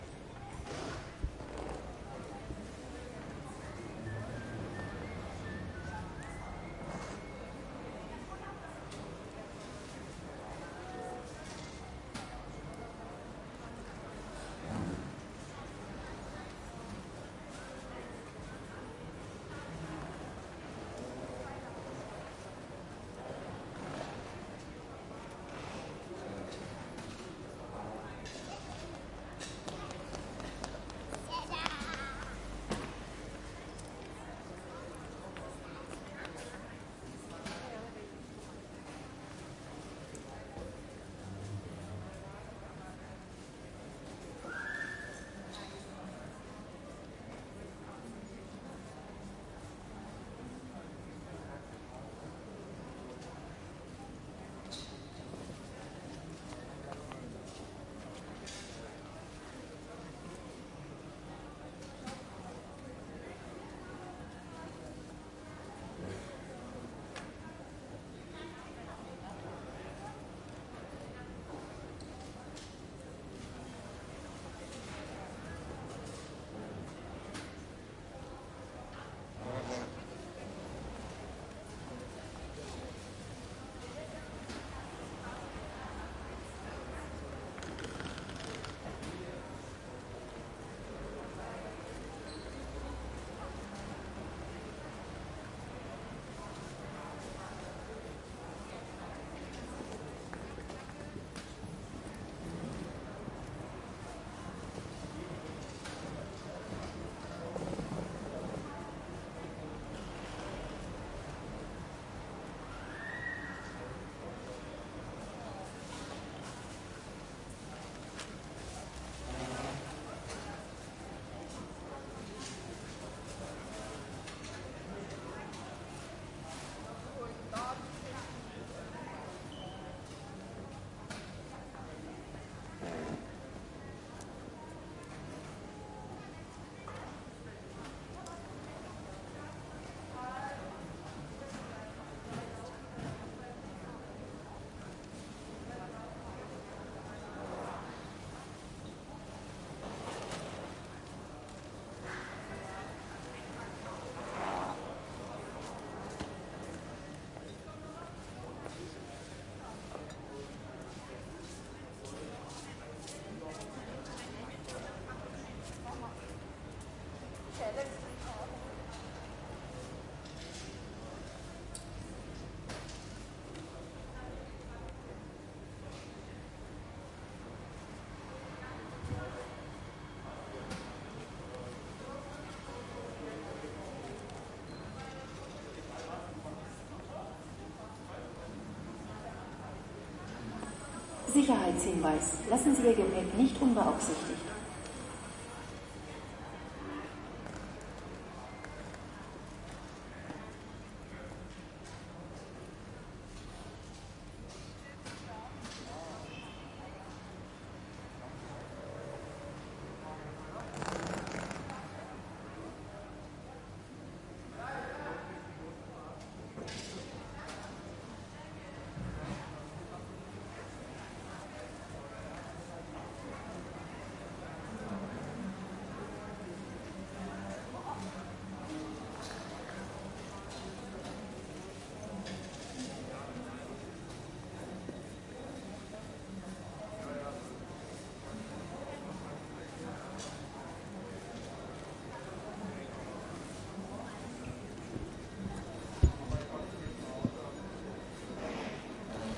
a recording with zoom am7 ms 120 microphone of a busy train station